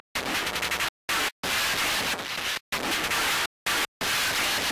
A few sample cuts from my song The Man (totally processed)